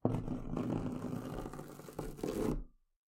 This is the sound of wood dragging along a rough surface.
Actually, it's the sound of a moving rough surface dragging along non-moving wood, but the sound is equivalent. If you need proof of that, ask Isaac Newton about his third law.